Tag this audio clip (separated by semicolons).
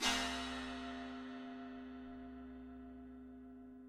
china-cymbal; sample; scrape; scraped